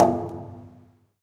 Recordings of different percussive sounds from abandoned small wave power plant. Tascam DR-100.